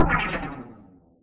layered, filtered, timestretched, percussion.